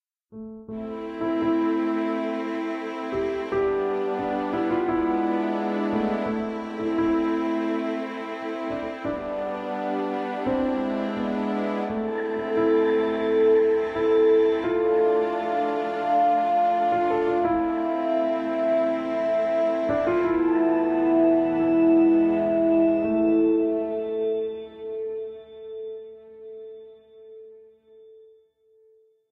Piano and violin dramatic/sad 30 seconds
Small piece of music written and recorded by me.